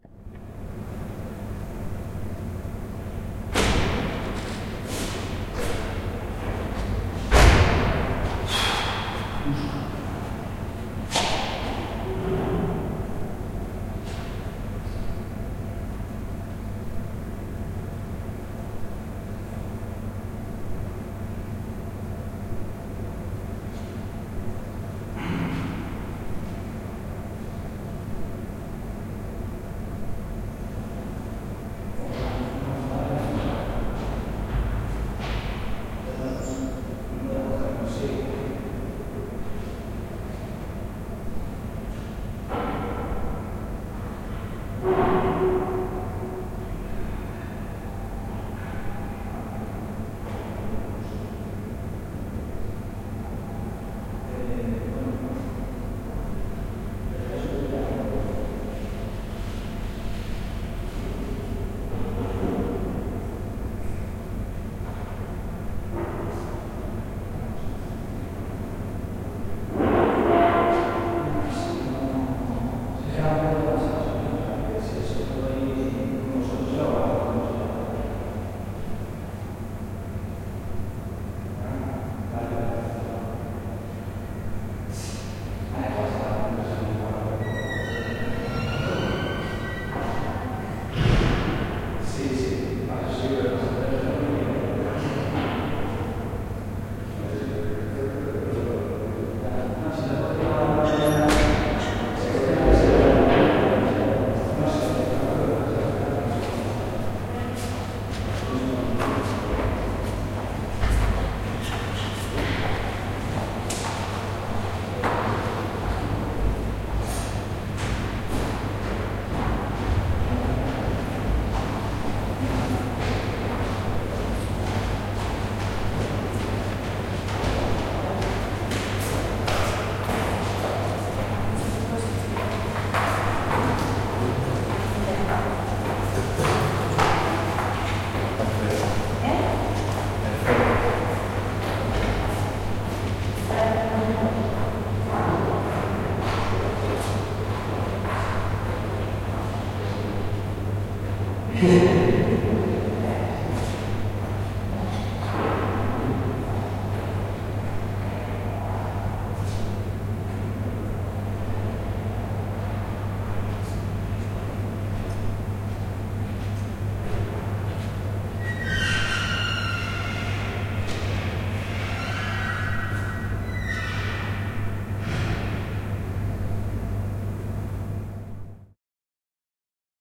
Room Tone Centro Cultura Galapagar
Centro, Cultura, Galapagar, Indistria, Room, Tone